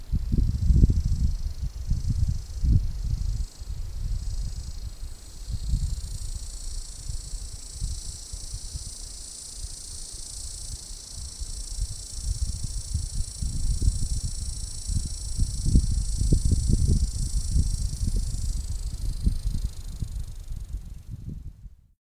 chirp desert creature
sound of a desert creature which i didn't find out yet what's the name of it or even how it looks like. sounds like that anyway. i'll post an update asaifo or please, share if you happen to know :)
animal, chirp, creature, frequency, high-pitch, long